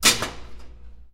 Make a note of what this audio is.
Sound of a metal cooking pot
chef, stove, metal, pan, hardware, pot, pots, clank, cooking